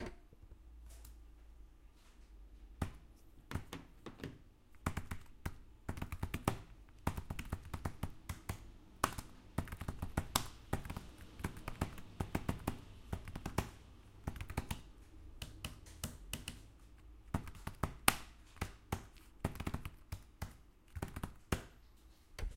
Teclado ordenador
Sonido de uso de teclado de ordenado